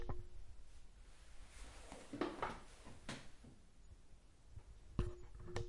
Sitting down in a plastic chair on hard floor.
Sit, Sitting